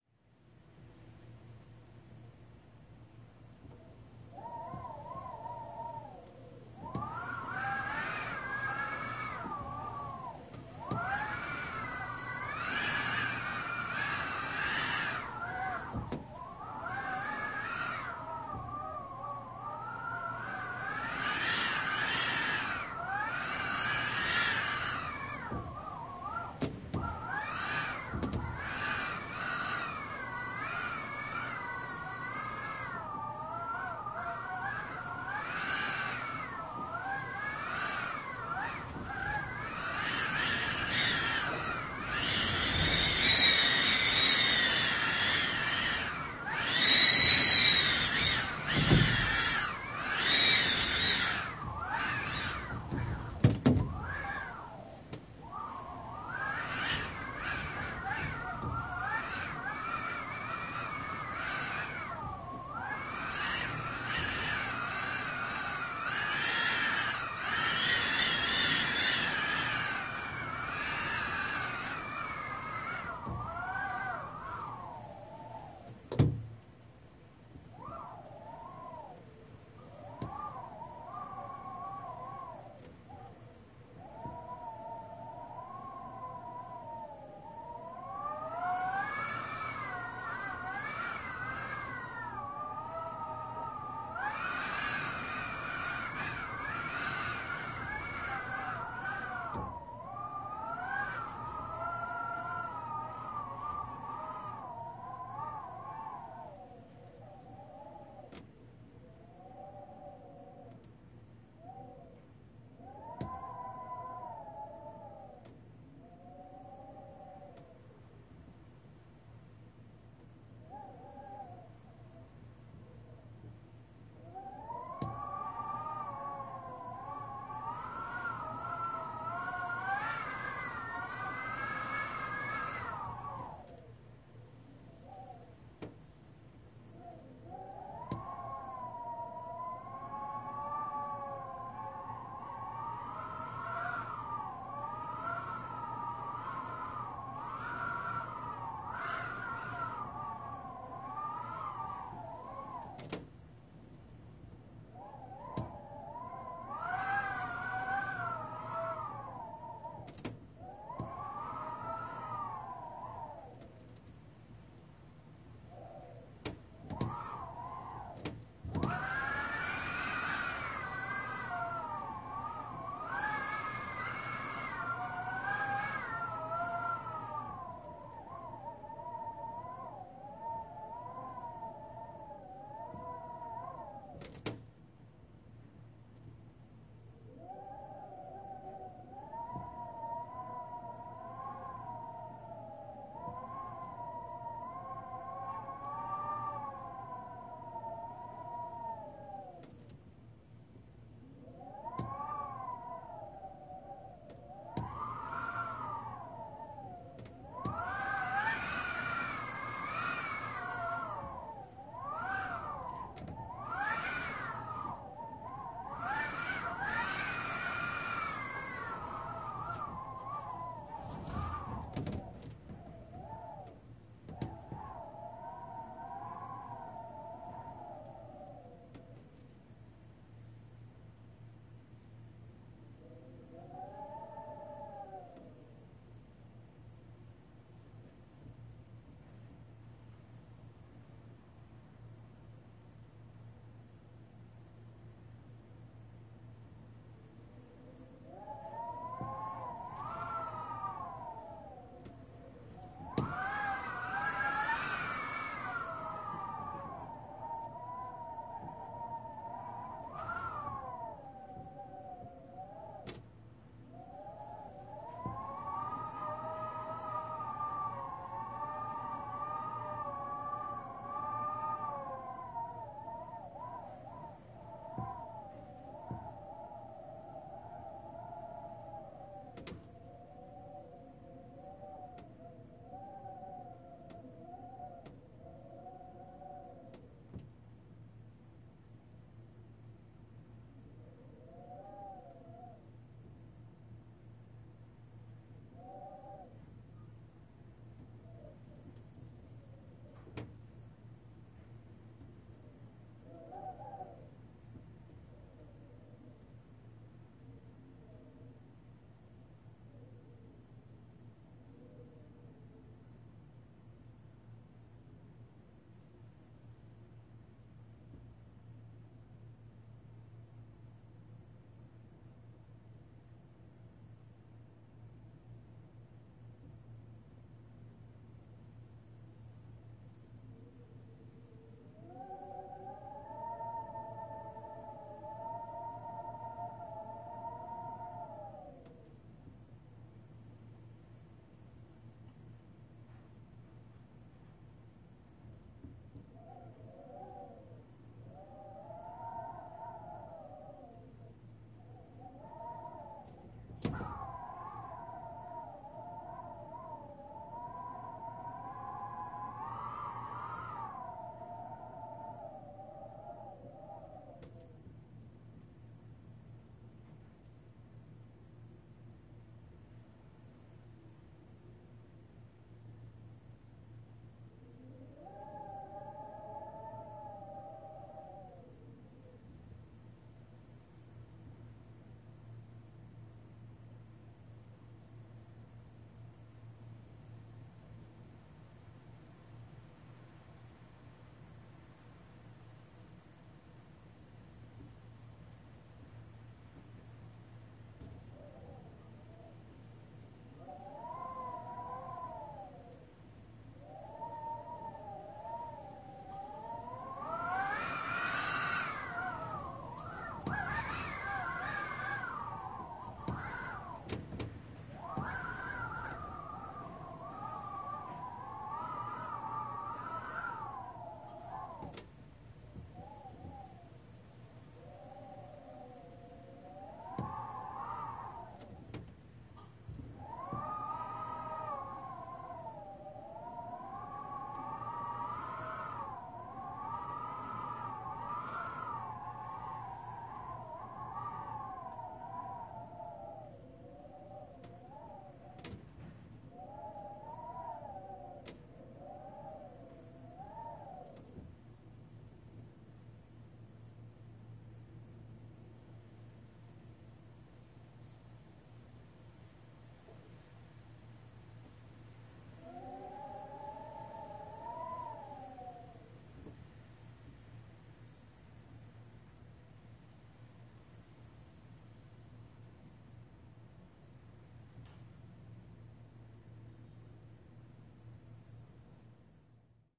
AE0027 Wind howling through cracks in a window 07-27-2009
Wind howls through cracks in a metal framed window. The window bangs as the wind pushes it around. Plenty of silence is included so noise reduction can be applied to taste. Recorded with a Zoom H2.
breeze, gust, howl, howling, moan, moaning, weather, whistle, whistling, wind